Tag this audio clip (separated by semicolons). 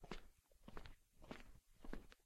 footsteps; foley